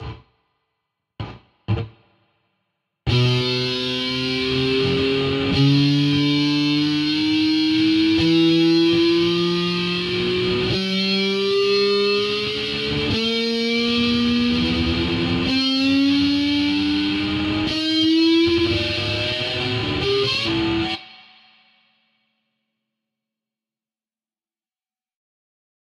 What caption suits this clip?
Crear efecto con un slide metallico para guitarra electrica
software, guitarra, PC